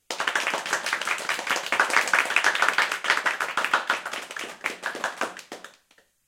Fast Applause - 5/6 persons - 1
A small group applauding fast.
{"fr":"Applaudissements rapides - 5/6 personnes - 1","desc":"Un petit groupe applaudissant rapidement.","tags":"applaudissements rapide groupe"}
fast, crowd, cheer, clapping, applause, group, audience, clap